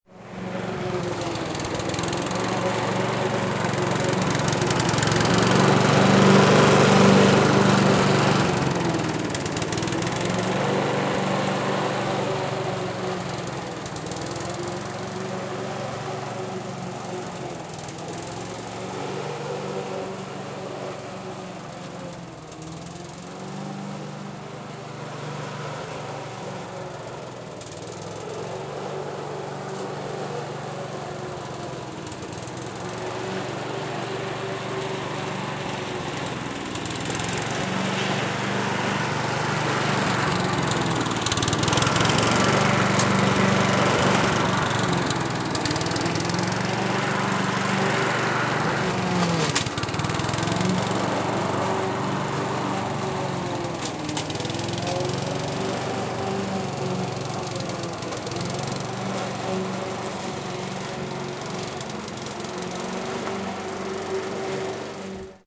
Lawn mower (Fuel)
Domestic walk-behind lawn mower, low throttle.
gas, grass, lawn, mower, mowing, petrol